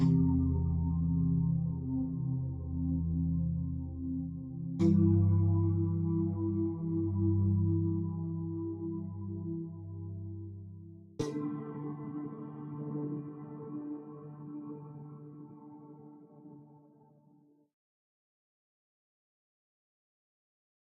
Hypo-Strings-150bpm
Low-budget Synth-String loop.
Nothing special.
synthetic, electronic, synth, synthesizer, loop, 150bpm, electro, string, soft